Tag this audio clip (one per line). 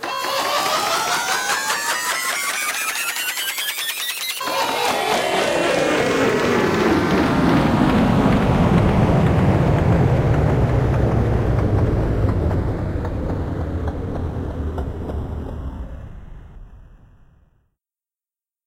damage
device
device-fail
fairytale
game
machine
machine-fail
robot
shutdown